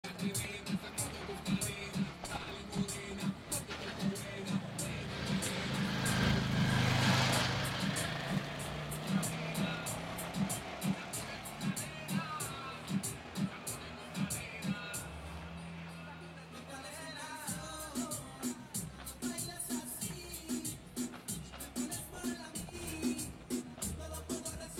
Feild record of gathered people around a popular market located in La Balanza, Lima, Perú. Recorder with a NTG-2 Rode Microphone along with a TASCAM DR 100 Mkii